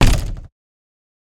A recording of me kicking a door. SMACK. This is a dry version of a sound to be used for a PC game. Kam i2 into a Zoom H4N.